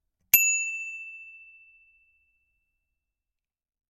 bicycle bell 05
Sound of a bicycle bell. Recorded with the Rode NTG-3 and the Fostex FR2-LE.
cycle, bike, bell